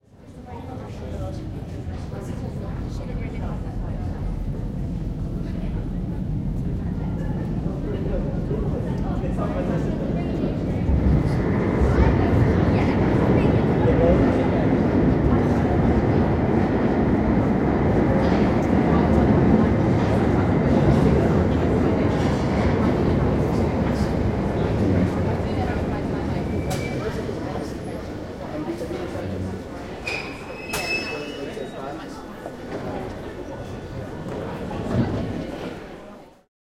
130406 london bakerstreettubestation platform
atmo on a platform of the baker street tube station in london, a train is arriving.
recorded with a zoom h-2, mics set to 90° dispersion.